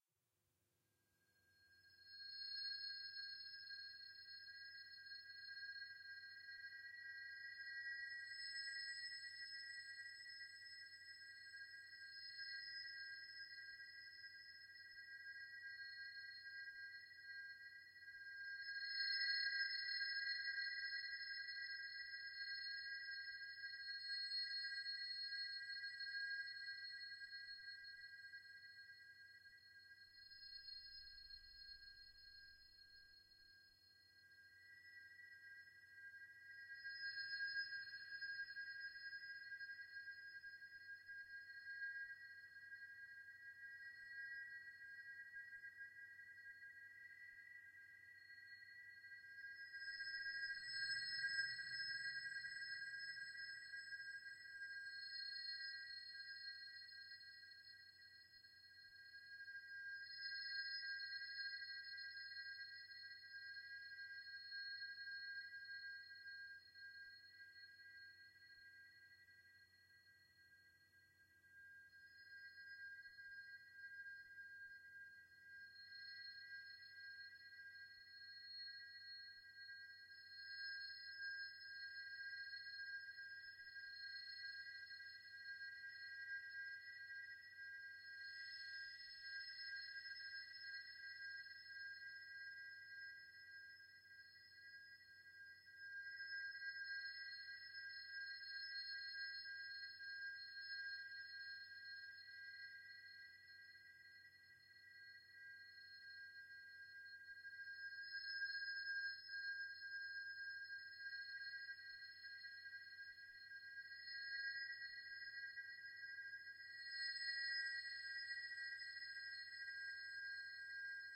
lucid drone
a high-pitched drone
also check out newer versions:
ambient, artificial, lucid, drone